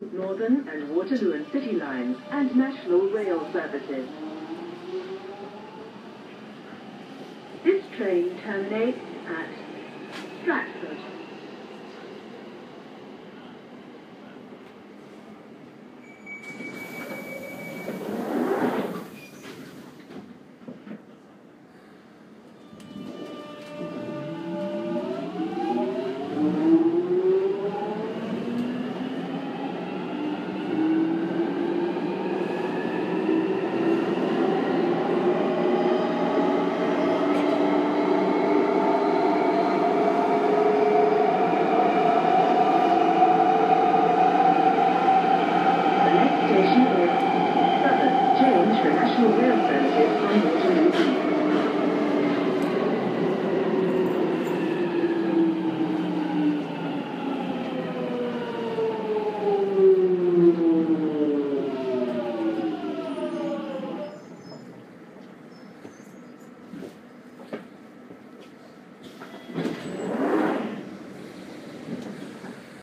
The Jubilee Line Sounds Like A Future F1 Car
I recorded this on the Jubilee line on the underground because I thought it sounded like a future F1 car. You could also say it sounds like a pod racer from star wars episode 1.